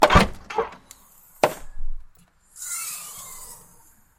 audi a4 b8 20tdi foley back open mechanism and engine mono
This ambient sound effect was recorded with high quality sound equipment and comes from a sound library called Audi A4 B8 2.0 TDI which is pack of 171 high quality audio files with a total length of 158 minutes. In this library you'll find various engine sounds recorded onboard and from exterior perspectives, along with foley and other sound effects.
a4, audi, automobile, back, car, close, closing, diesel, door, effect, engine, foley, gear, hydraulic, mechanism, motor, open, opening, sound, vehicle